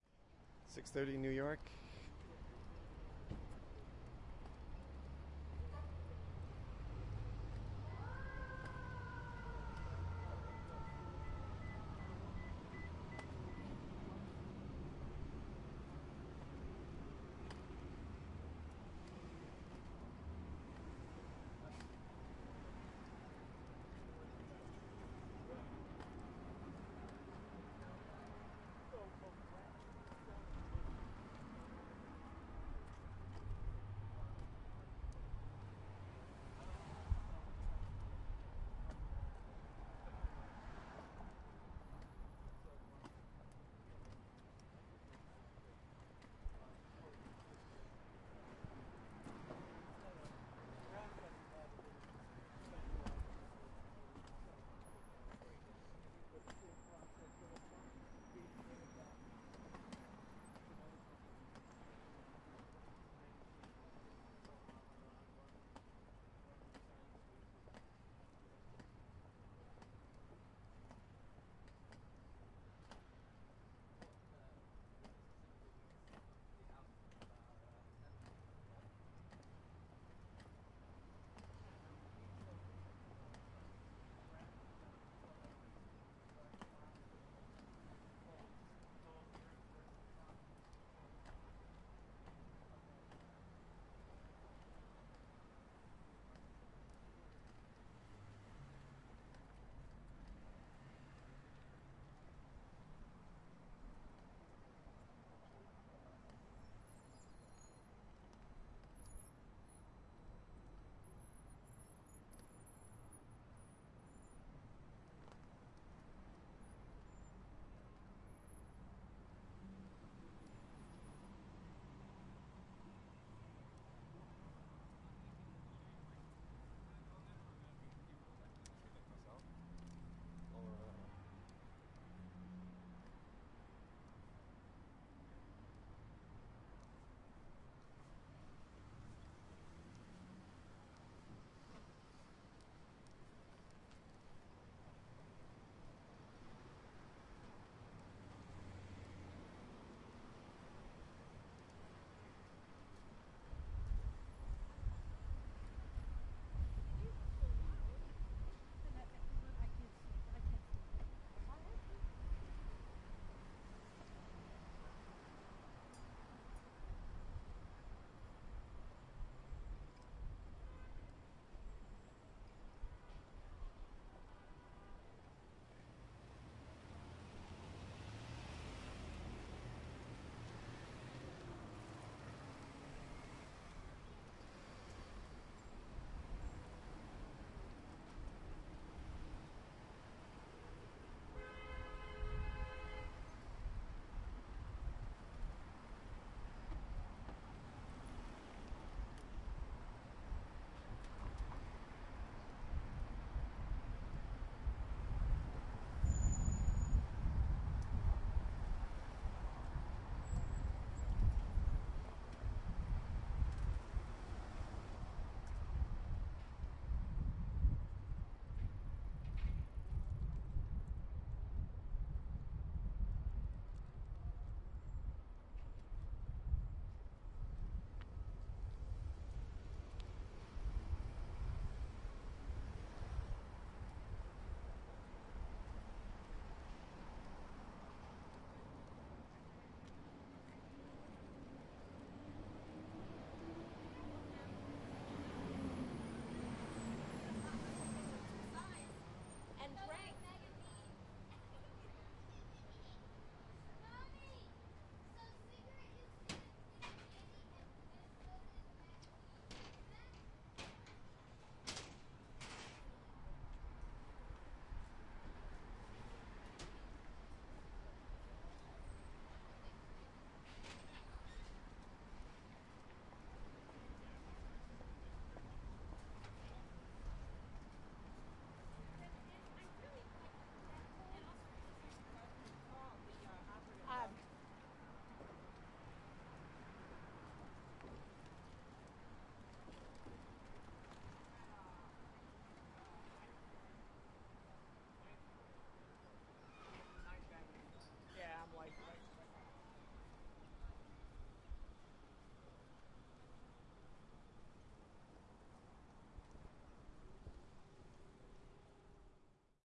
front ST NYC fall 2011 street pedestrian
traffic, siren, surround, beeps